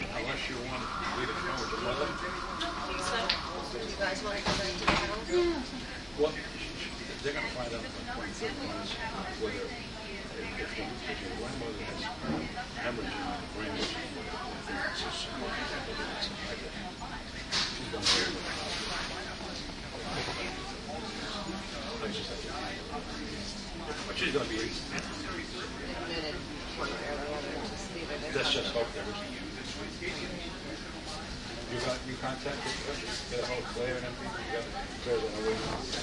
ER corner4
Sitting in a corner at the hospital emergency room recorded with DS-40.